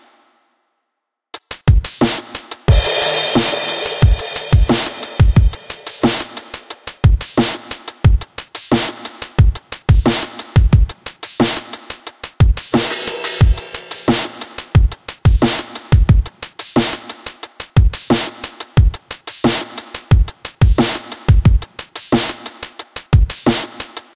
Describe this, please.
Snarein2kickstepWHFullLOOP
179BPM, Bass, Beat, DnB, Dream, Drum, DrumAndBass, DrumNBass, dvizion, Fast, Heavy, Loop, Pad, Synth, Vocals